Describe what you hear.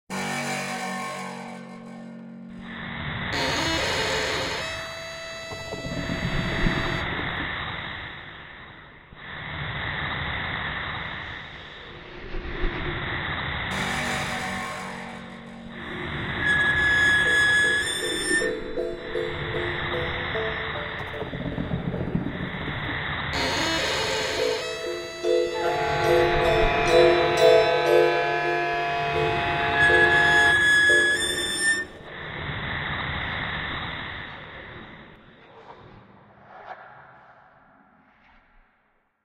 Some Soundscapes to scary your little sister or maybe for movies or games.
Used:
Kontakt 4, Roland JV1080, Kore Player, Alchemy Player, BS Engine, UVI Workstation, few
Samples from MusicRadar and WorldTune